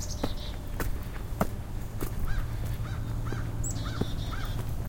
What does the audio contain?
hard outdoor stomping w birds 1-2
Recorded with the internal microphone on a Roland Edirol with the gain on high. I believe I was wearing boots this day, so the footsteps really stomp in the foreground. Birds are heard throughout, beginning with a crow.
birds, stomping, background, outdoor, hard